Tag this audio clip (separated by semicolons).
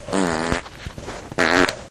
fart; flatulation